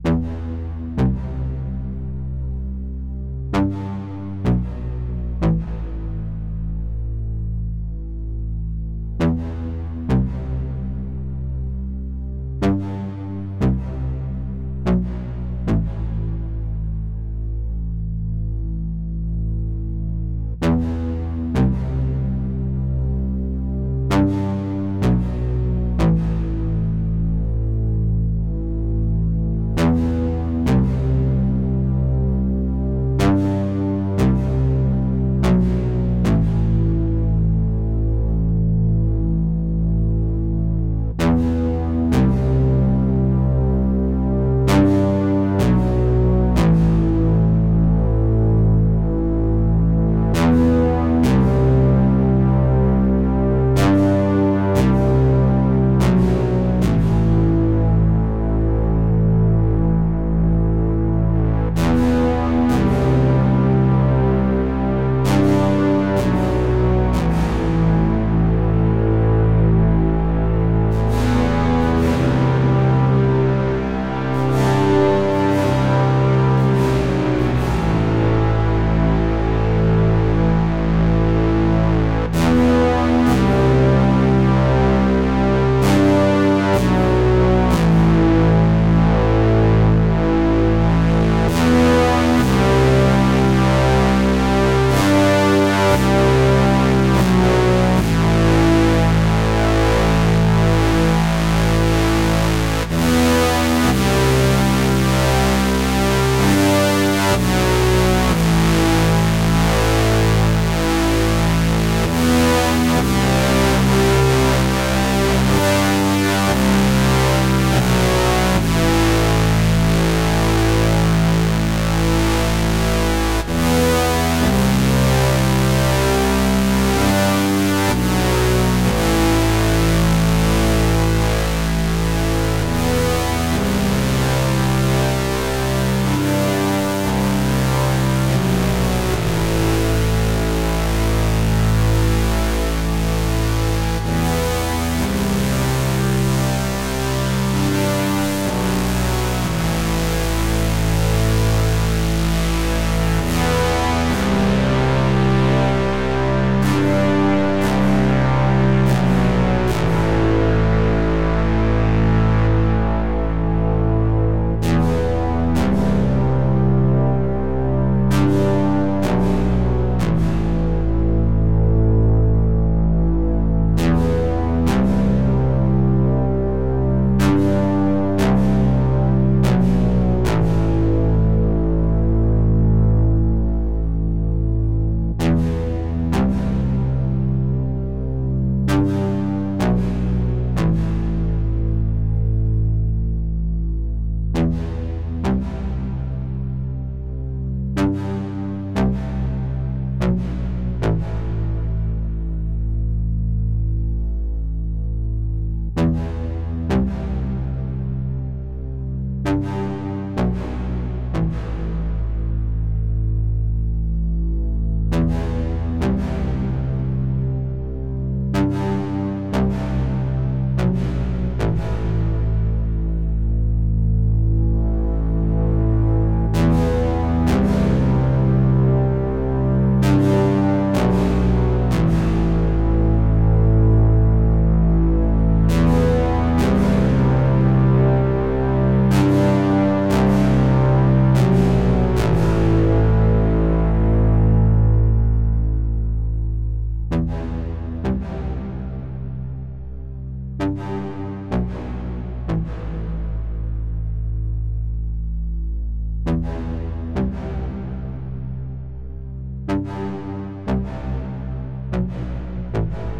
ALEXA PROGRESSIVE BASS
synthesizer with filter and reverb, progressive line.
Electric-Dance-Music, loop, Deadmau5, Synth, Bass, techno, Progressive